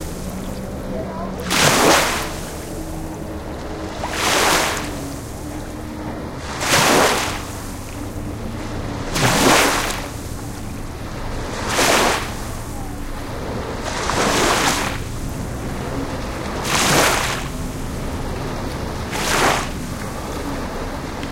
Water wave Beach Peoples Field-recording 200815 0036
Water wave Beach Peoples ships Field-recording
Recorded Tascam DR-05X
Edited: Adobe + FXs + Mastered
beach, coast, field-recording, nature, ocean, Peaceful, people, Peoples, sea, seaside, ships, shore, speak, vast, water, wave, waves